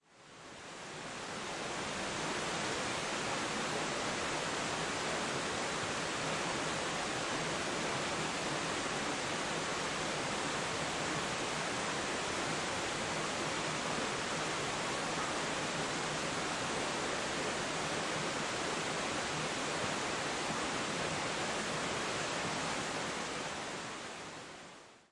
Distant field recording of water flowing through some rapids in a creek.
Recorded at Springbrook National Park, Queensland using the Zoom H6 Mid-side module.